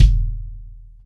Bass Drum+Evans
custom, wenge, metronome, cymbals, snare, K-Custom, beat, click, drumset, cymbal, hi-hat, crash, ride, turkish, one, drum, shot, bronze, bubinga, one-shot